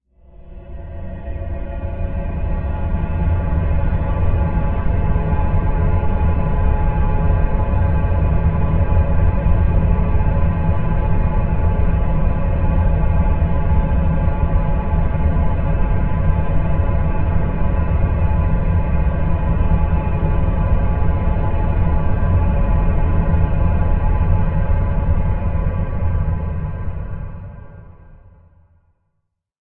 A dark, ominous drone.